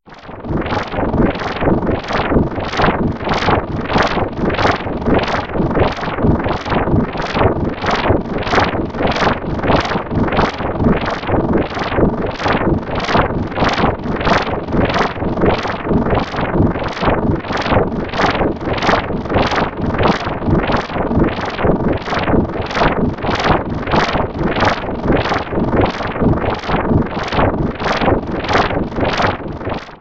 SYnth NoisesAU
Artificially produced clips to be used for whatever you wish. Mix them, chop them, slice 'em and dice 'em!
Artificial, strange, Ambiance, Noise, Synthetic, Machinery, machine, Alien